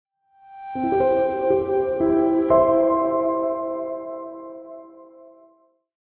Corporate Rise-and-Hit 02
Corporate Rise-and-Hit logo sound.